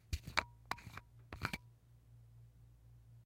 low tones, three turns of a bottle cap. No pressure release at the end. glass bottle, cap turning.